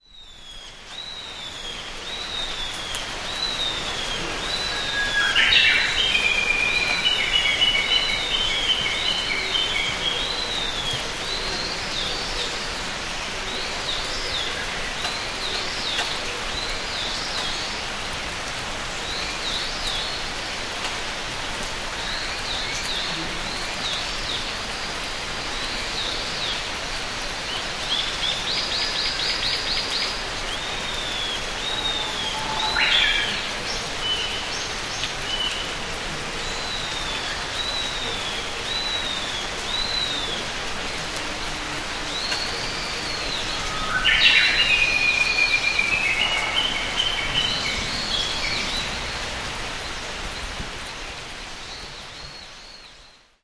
Field recording in the forest at Hokkaido, Japan.
Japanese bush warbler was twittering in gentle rain.
bird, field-recording, forest, hokkaido, japan, japanese-bush-warbler, rain